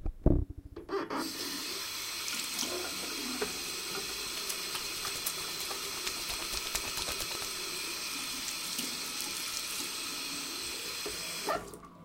washing hands 1
This sound is part of the sound creation that has to be done in the subject Sound Creation Lab in Pompeu Fabra university. It consists on a person washing his hands.
toilet, bathroom, wc, wash, hands